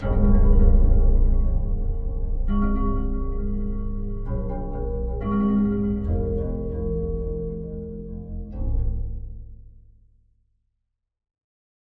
Tightrope pizz
Deep and resonant physical model of a 7 meter tightrope exited by cello and harp pizz.